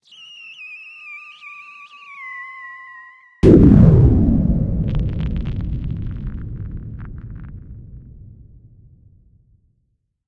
Now please pump the volume high when listening to this one.
A bomb created entirely synthetically. First the whistler is done with Friction, a plugin by Xoxos. Next the explosion, entirely generated with WusikStation. Finally the debris falling imitated with Vinylator, another VST plugin. Enjoy!